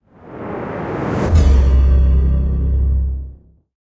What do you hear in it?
Riser Hit sfx 006
Effects recorded from the field.
Recording gear-Zoom h6 and microphone Oktava MK-012-01.
Cubase 10.5
Sampler Native instruments Kontakt 61
Native instruments Reaktor 6 synth
riser, swooping, stinger, thump, transition, accelerating, opener, hit, intro, up, implosion, whoosh, thud, rise, swoosh, rising, video, trailer, build, impact, cinematic, hybrid